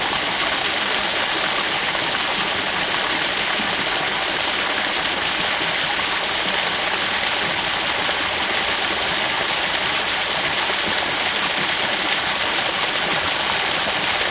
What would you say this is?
Tony Neuman park streaming close
Recording of a small pound and streams present in Tony Neuman`s Park, Luxembourg.
stream, nature, water, park, field-recording